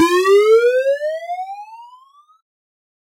A bouncy sound.
rising, bloop, bounce, bleep, boing